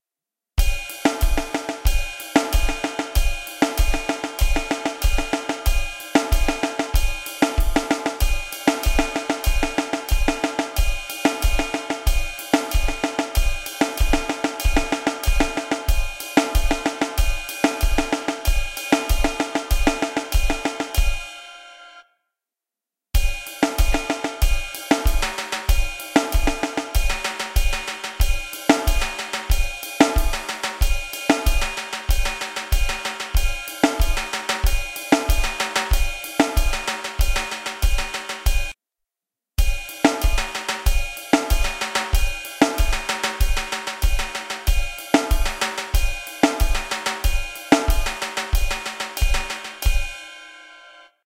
Regueton drum loop 94 bpm
A standard drum loop in regueton style @ 94 bpm played on a Roland V-drums